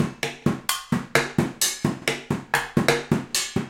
IMPROV PERCS 141 2 BARS 130 BPM
Sources were placed on the studio floor and played with two regular drumsticks. A central AKG C414 in omni config through NPNG preamp was the closest mic. Two Josephson C617s through Millennia Media preamps captured the room ambience. Sources included water bottles, large vacuum cleaner pipes, wood offcuts, food containers and various other objects which were never meant to be used like this. All sources were recorded into Pro Tools through Frontier Design Group converters and large amounts of Beat Detective were employed to make something decent out of our terrible playing. Final processing was carried out in Cool Edit Pro. Recorded by Brady Leduc and myself at Pulsworks Audio Arts.
acoustic, funky, drum-loop, music, bottle, beats, hoover, ambient, food, cleaner, hard, breakbeat, groovy, loops